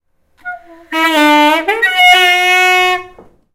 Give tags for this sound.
Galliard; Primary; School; Squeeky; UK

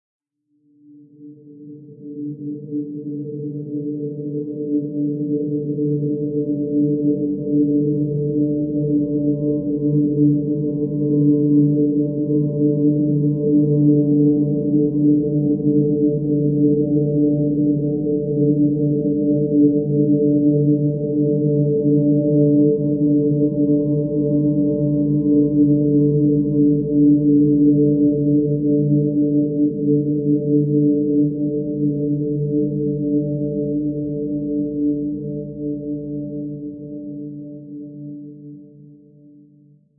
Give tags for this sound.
ambient; multisample; drone; atmosphere

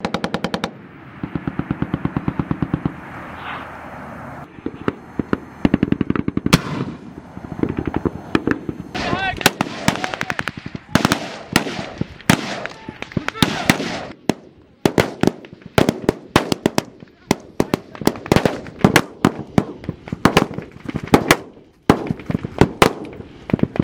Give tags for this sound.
attack; fire; kill; killing; live-fire; weapon

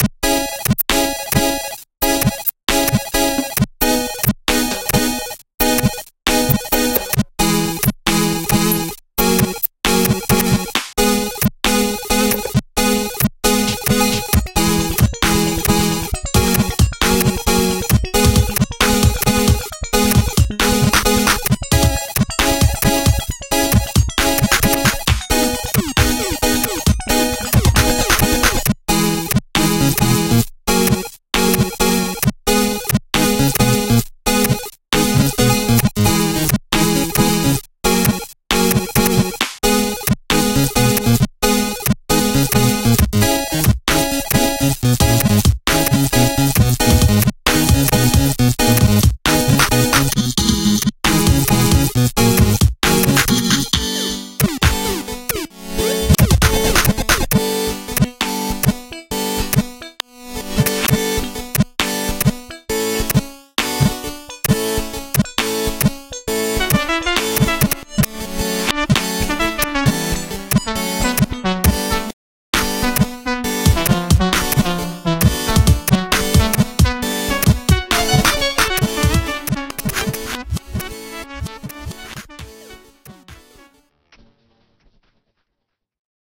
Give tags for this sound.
2013; 8bit; blix; chip; cosmic; laboratory-toy-toons; nintendo-sounding

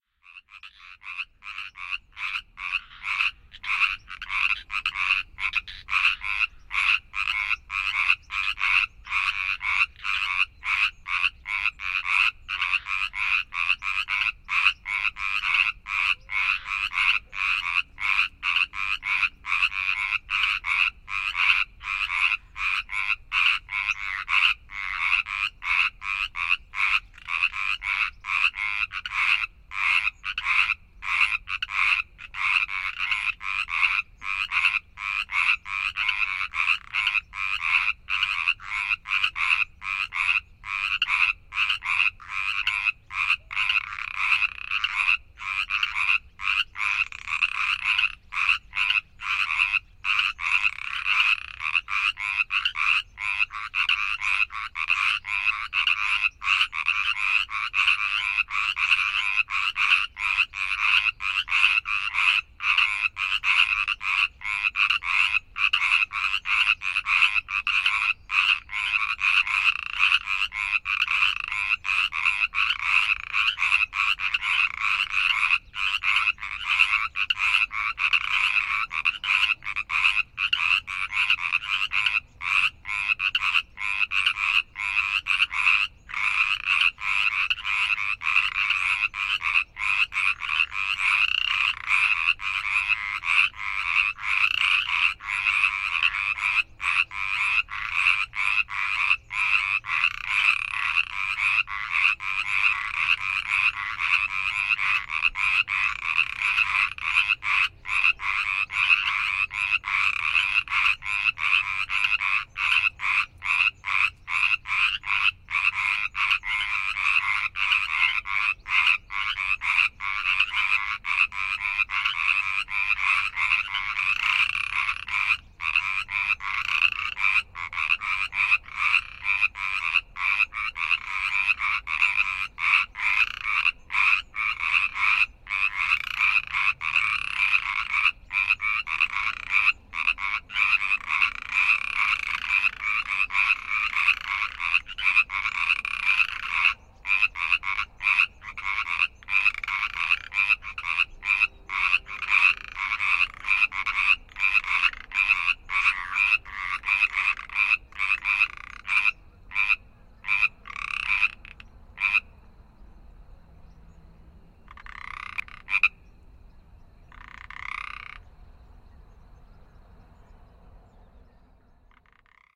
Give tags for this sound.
sherman-island; california; frogs

